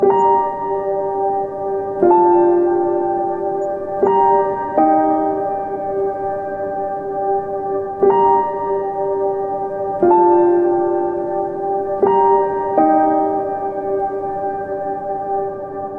Melancholic Piano Loop
A sad type of piano loop that was constructed with various VST instruments.